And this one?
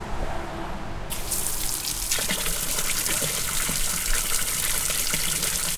hose pouring into a bucket
hose, water, outside